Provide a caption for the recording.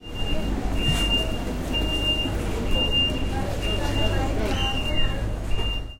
12 Terminal de transporte IPIALESPitoBusParqueando
field-recording, grabacion-de-campo, paisaje-sonoro, pasto-sounds, proyecto-SIAS-UAN, SIAS-UAN-project, sonidos-de-pasto, soundscape